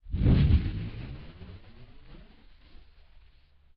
masking tape.3
peeling tape off of a masking tape roll (reverse+pitch down)
weird, pitch, manipulated, MTC500-M002-s14